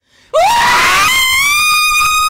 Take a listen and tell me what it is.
This is part of series of recorded material for LaFormela Fashion Show Intro, recorded by Zoom h6 a rode ntg3.